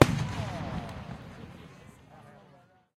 fireworks impact3
Various explosion sounds recorded during a bastille day pyrotechnic show in Britanny. Blasts, sparkles and crowd reactions. Recorded with an h2n in M/S stereo mode.
blasts, bombs, crowd, display-pyrotechnics, explosions, explosives, field-recording, fireworks, pyrotechnics, show